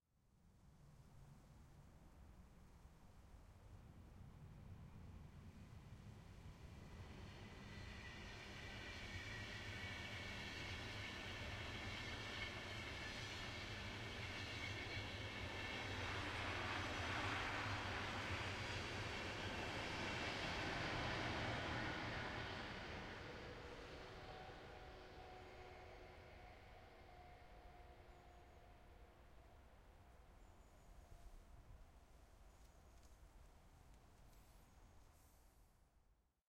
Distant Train Passing
Soft city ambience with a train passing in the distance of aprox 150m. Recorded in 90° XY with a Zoom HD2 at Priesterweg, Berlin, in September 2016
railway, railroad, passenger-train, rail-way, train, field-recording, distance, rail, rail-road